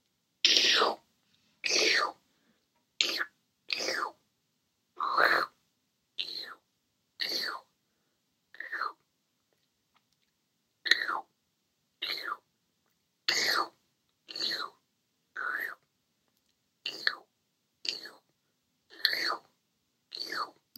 A series of humorous licking sounds.
cartoon, licking, lips, mouth